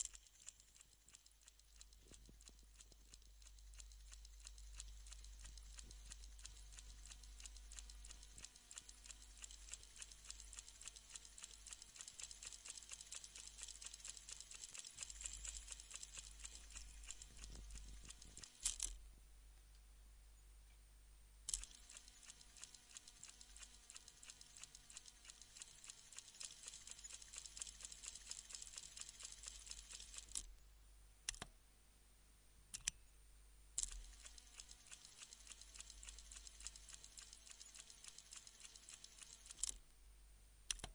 Queneau machine à coudre 15
son de machine à coudre
POWER, machine, machinery, industrial, coudre